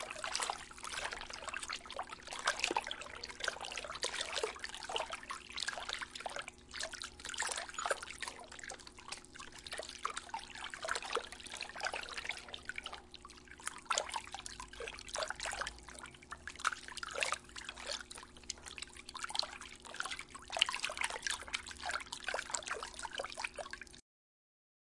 Sound of water moving in a bucket